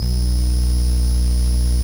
Electronic hum/buzz noises from the Mute Synth 2.
noise, hum, electronic, analogue, buzz, Mute-Synth-II, Mute-Synth-2, beep